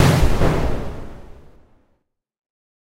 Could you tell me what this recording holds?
f016ms RaySpace
Simulated in a room in a building
mathematical-model, explosion